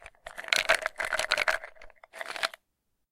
Pill Bottle Quick Shaking out Pills 02
Shaking pills out of a pill bottle. This is one of multiple similar sounds in the same sound pack.
pill, plastic, container, shake, bottle, medication, pills, medicine, shaking